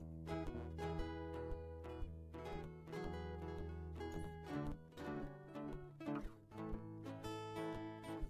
On the Horizon loop
Recorded using Digitech JamMan Solo looping pedal. Electric/acoustic guitar and keyboard both plugged in. Layed down base track and then just played around, layering different tones.
uplifting loop. guitars bounce. recorded with a guitar and looping pedal